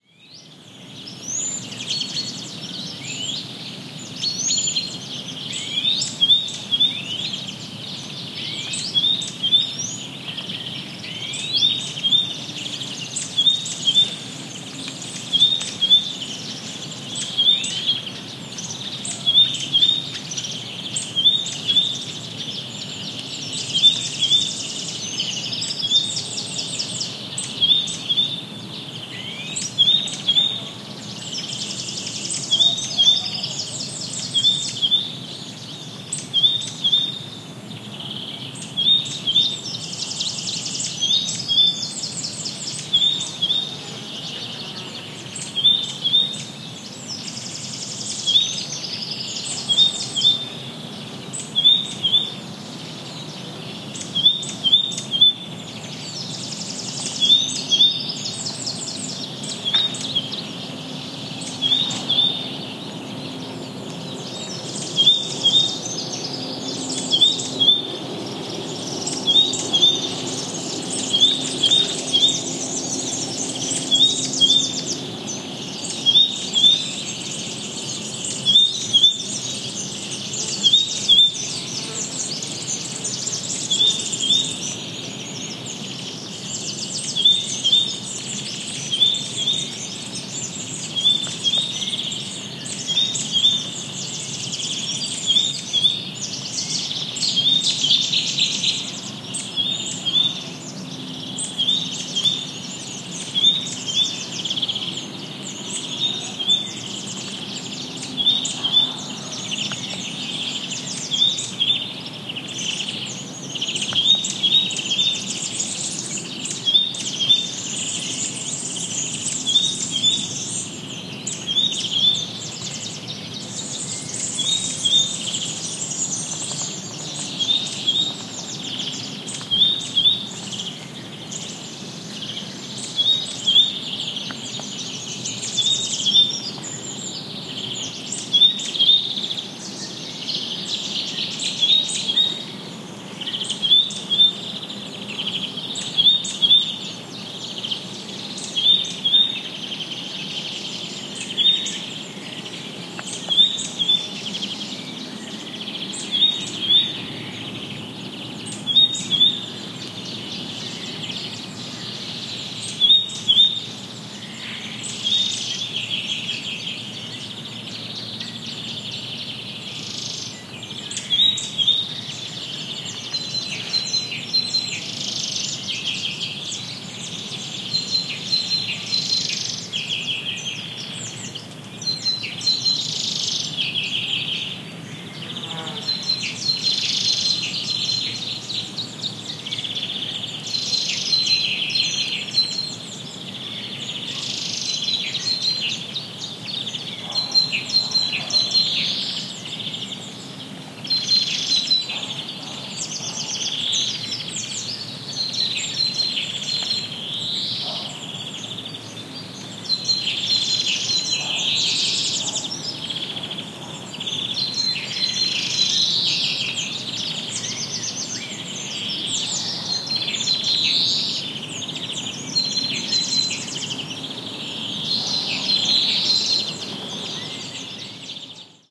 Test recording of forest ambiance with lots of birds singing (Warbler, Serin, Blackbird, Cuckoo, Azure-winged Magpie, among others), with a Great Tit in foreground and some distant voices. Audiotecnica BP4025 into Sound Devices Mixpre-3. Recorded near Hinojos (Huelva Province, S Spain).
gear, Great-tit, birds, spring, south-spain, nature, field-recording, forest